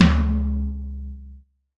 DW drum kit, used: Sennheiser e604 Drum Microphone, WaveLab, FL, Yamaha THR10, lenovo laptop
drum, DW, floor, kit, tom